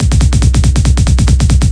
A simple trancy drumroll, use with others in my "Misc Beat Pack" in order from 1 to 11 to create a speeding up drumroll for intros.
Trance, Drumroll, Psytrance